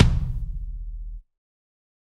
Kick Of God Wet 022
drum, god, kit, pack, realistic, set